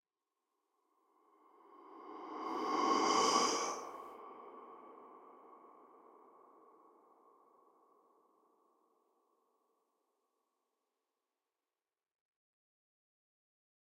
Male creepy blowing.Fade in and tail reverb 1-4(dns,Eq,MS,rvrb)
Otherworldly sound of male breathing. Close and walk-through stereo panorama. My voice was recorded, then processed with noise reduction. The processing includes equalization(remove voice resonances) and impulse reverb (including reverse) with filtering. Enjoy it. If it does not bother you, share links to your work where this sound was used.
Note: audio quality is always better when downloaded.
fx, shadow, effect, swish, suspense, ghost, whoosh, creepy, breathing, eerie, sound, game, sfx, nightmare, scary, male, sound-design, terrifying, dark, sinister, spooky, fear, cinematic, phantom, film, swoosh, thrill, horror, blowing, reverb